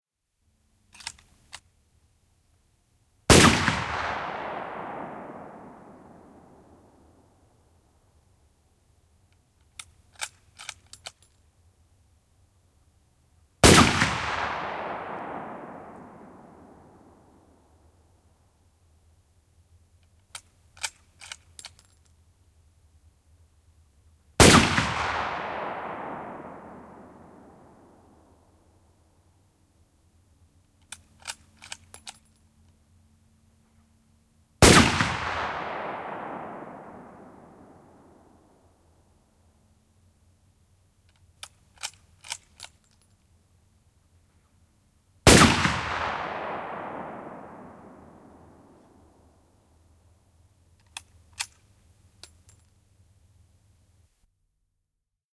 Kivääri, kaikuvia laukauksia ulkona / A rifle, 7,62x54R calibre, echoing, solid single shots, loadings, exterior
Kolmen linjan kivääri, 7,62 mm. Jykeviä laukauksia, ampumista, kaikua. Latauksia. (7,62x54R -kaliiperia).
Paikka/Place: Suomi / Finland / Vihti, Leppärlä
Aika/Date: 12.10.1988
Ammunta, Ase, Aseet, Field-Recording, Finland, Finnish-Broadcasting-Company, Gun, Gunshot, Laukaukset, Laukaus, Shooting, Shot, Soundfx, Suomi, Weapon, Weapons, Yle, Yleisradio